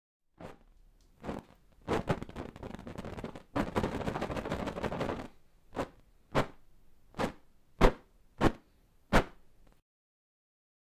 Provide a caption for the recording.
Indiana Jones Fire stick shake 2